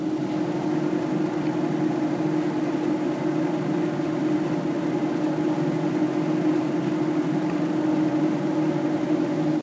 Vent Noise 02
The sound of an electric vent whirring for a few seconds.